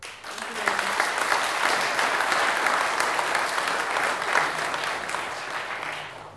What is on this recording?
Medium Crowd Clapping 2
A medium sized crowd clapping for a speaker who just presented. Recorded on the Zoom H4n at a small distance from the crowd.
Location: TU Delft Sports & Culture Theater, Delft, The Netherlands
Check out the pack for similar applauding sounds.
clapping, applaud, medium, clap, audience, applause, crowd, group